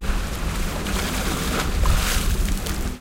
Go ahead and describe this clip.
Giant breathing 26
One in the series of short clips for Sonokids omni pad project. A short clip of sea splashing near the Sea organ.
giant, sonokids-omni, sea-organ, breathing, field-recording